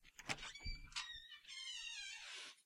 A heavy front door being opened.
open-door, door, foley, heavy-door